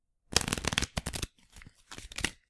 Bridging cards to shuffle them
blackjack, bridging, card, cards, gambling, home-recording, poker, shuffle, shuffling